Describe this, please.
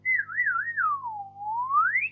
Cartoon spin
Sound of something spinning around, fit for cartoon sequences. Actually just a simple recording of my whistling.
dizzy, comedy, funny, spin, whistle, spinning, cartoon, silly